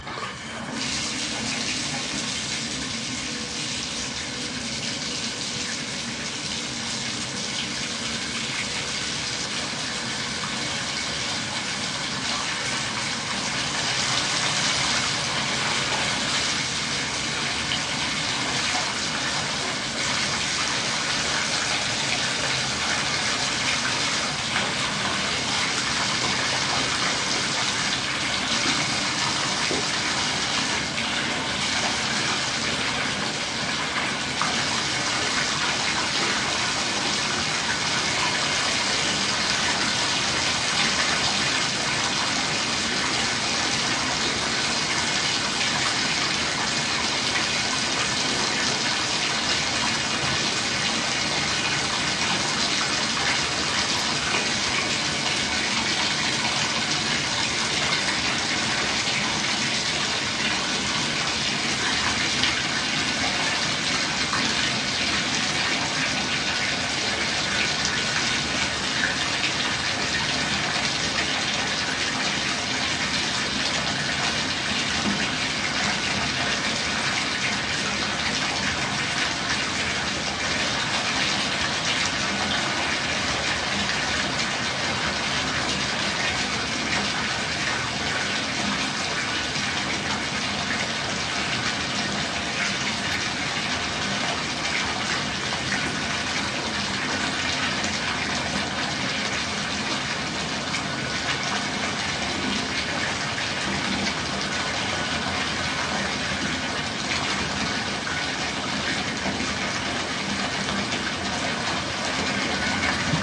filiing up the tub
The sound of a tub being filled with water.
tub, splashing, filling-a-tub, water-sounds, water